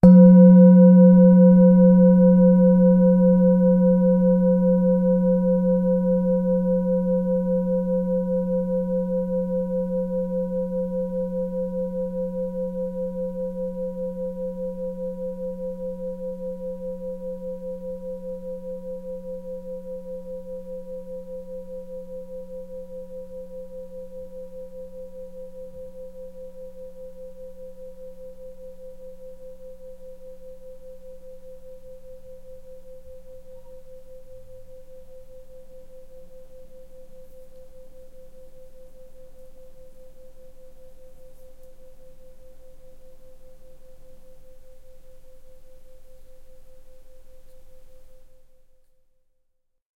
singing bowl - single strike 1
singing bowl
single strike with an soft mallet
Main Frequency's:
182Hz (F#3)
519Hz (C5)
967Hz (B5)
mic-90, record, singing-bowl, soft-mallet, Zoom-H4n